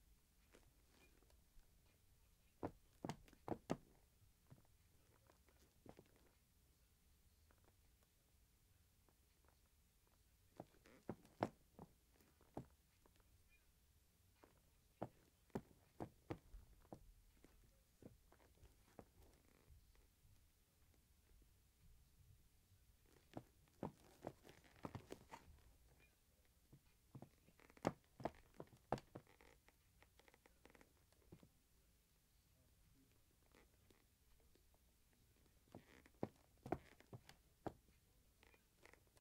Footsteps - These are footsteps on wood.